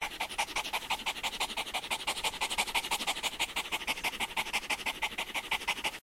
York-loop-1
puppy, dog